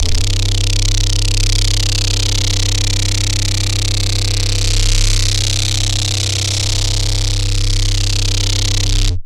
ABRSV RCS 042
Driven reece bass, recorded in C, cycled (with loop points)